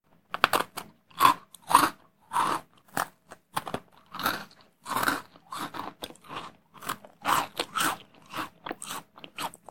LEGUERN Tracy 2015 2016 biscuit
Noise of a delicious cookie eaten slowly with pleasure.
Recording one sound
Reduction of the noise of the microphone
Amplification
Rapprochment of noise to give a rate
> Focus on the noise of biscuit for a precise and dynamic tasting. That is why, there is not much modifications.
crisp, mouth, eat, crunchy